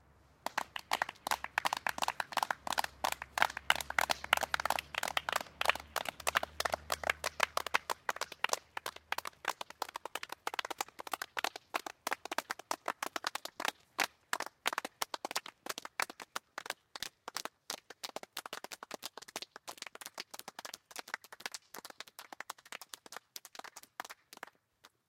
Group of people - Clapping - Outside
A group of people (+/- 7 persons) clapping - Exterior recording - Mono.